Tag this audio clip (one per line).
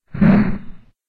baseball
play
swing
swinging